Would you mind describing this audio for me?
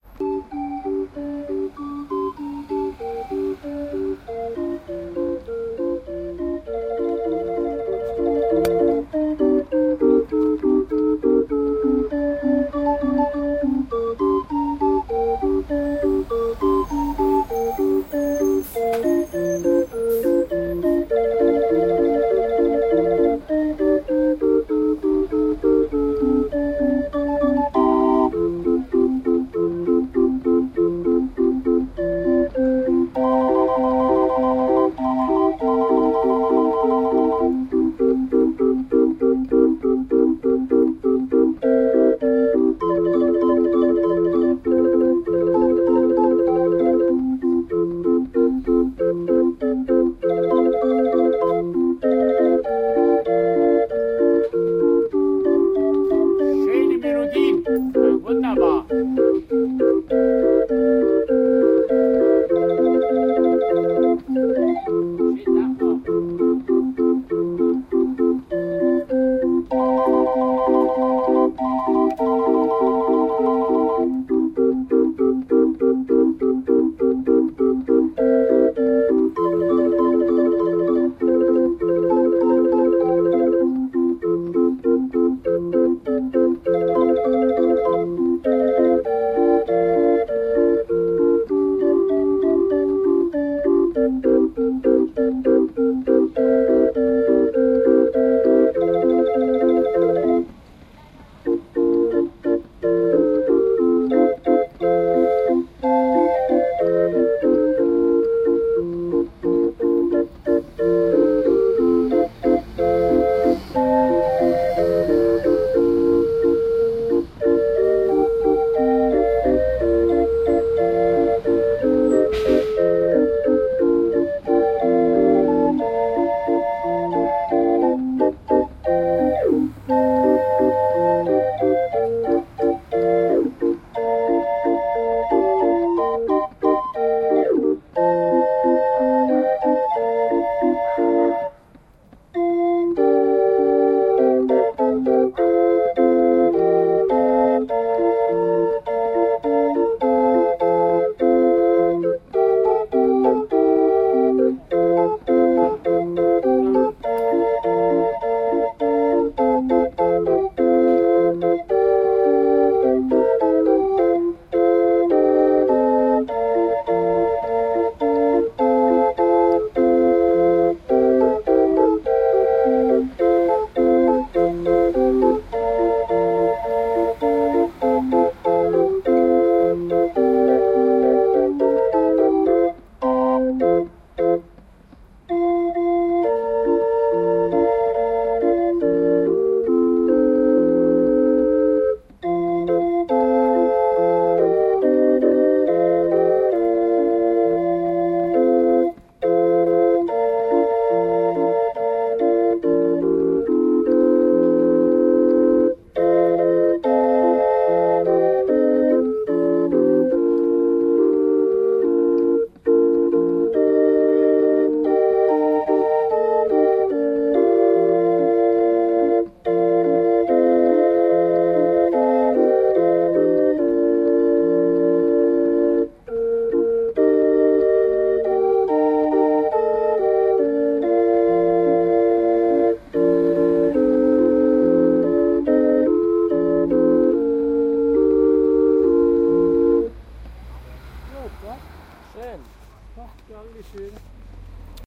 I moved the recorder a little further away for this one, there is a bit more ambient sound from the street, the only big advantage is the 'schoene Melodie, wunderbar!' a passer-by shouts, positively a blast from the past. The first melody is indeed beautiful. NB the beginning has a bit of equipment-related clatter.
organ, berlin, music, german, recording, traditional, field, grinder